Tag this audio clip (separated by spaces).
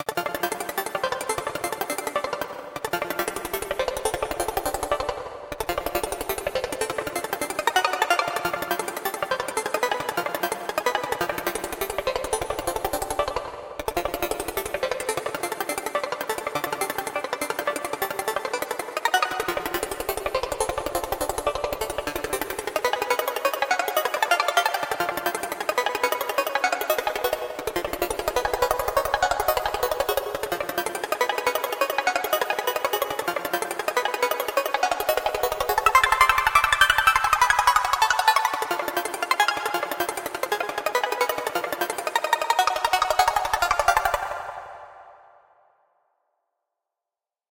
8-bit hit synthesizer chords digital awesome video music drum loops synth sounds drums loop sample melody samples game